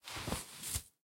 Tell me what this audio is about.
Clothes Rustling Movement 12 4
Body, Buckle, Shaking, Cloth, Shuffling, Blanket, Nylon, Shirt, Recording, Leather, Movement, Sweater, Textiles, Belt, Acessories, Handling, Soft, Clothing, Rustling, Running, Foley, Person, Natural, Clothes, Cotton, Walking, Sound, Design, Fabric, Trousers